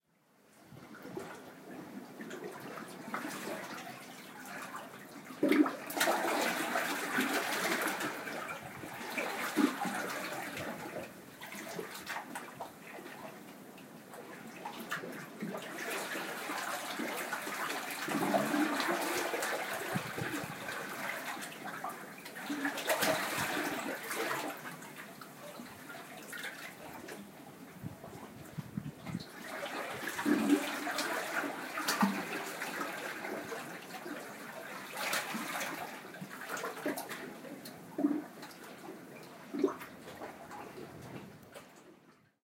Sea waves in a cave
I climbed down a narrow rock cave to record the eerie sounds of waves and water pouring in and out of the cave. It seems like it's never exactly the same sound. Good for setting ambiance in a cave for your treasure hunting pirate movie or audiobook.
ambient, italy, Sardinia, rocks, beach, wave, hollow, sea, field-recording, water, cave, nature, foley, ambiance, Mediterranean